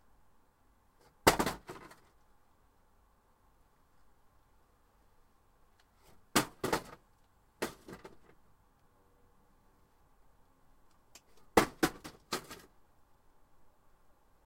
raw recordings of a wooden crate falling and hitting asphalt